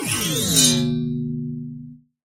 BS Bend 2
metallic effects using a bench vise fixed sawblade and some tools to hit, bend, manipulate.
Inflect, Sound, Metal, Effect, Curve, Sawblade, Curved, Saw, Bend, Stretch, Flex, Squeaking